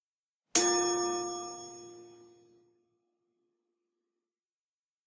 Chime Rod F4 (100% velocity)
Plastic pen striking single rod from this set of grandfather clock chimes:
Rod is F4 in scientific pitch notation, roughly corresponding to 349.23Hz or MIDI note 65. Struck with 100% velocity relative to sound pack. Recorded with internal mic of 21.5-inch, Late 2009 iMac (sorry to all audio pros 😢).
chiming, music, grandfather-clock, music-note